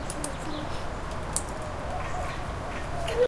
Snippet 3 of a Red-bellied woodpecker in my backyard
field-recording, backyard, woodpecker, bird